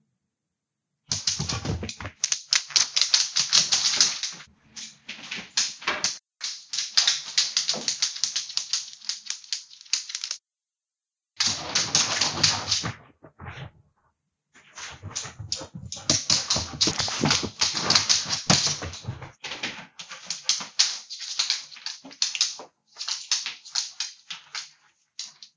Skittering Dog
Samples of medium sized dog walking/sliding on bare wood floor.